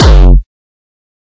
Distorted kick created with F.L. Studio. Blood Overdrive, Parametric EQ, Stereo enhancer, and EQUO effects were used.
hardcore, hard, distortion, techno, drumloop, progression